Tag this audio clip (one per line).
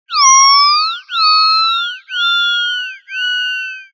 vocalization
animal
fauna
alien
sci-fi
synthetic
sound-effect
creature
sfx